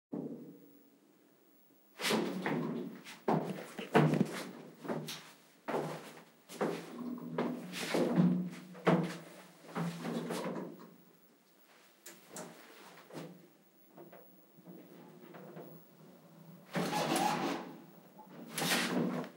Walking on Metal Floor
Walking in Elevator